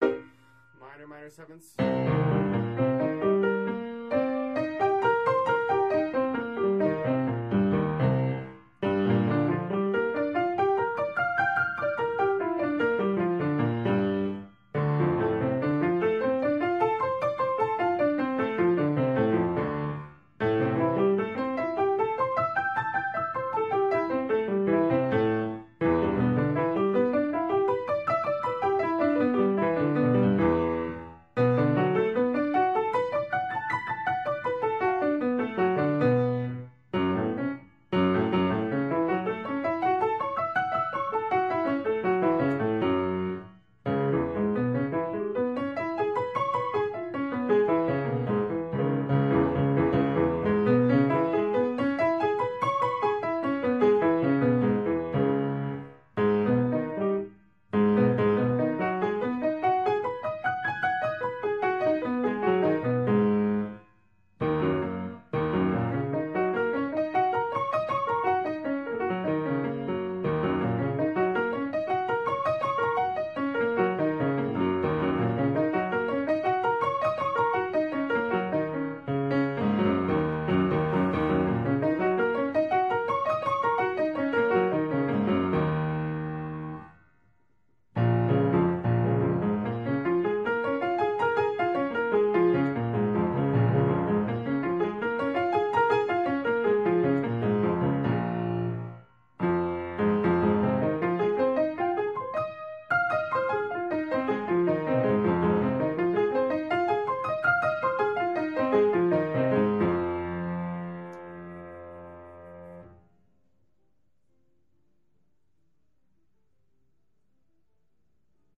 Practice Files from one day of Piano Practice (140502)
Piano, Practice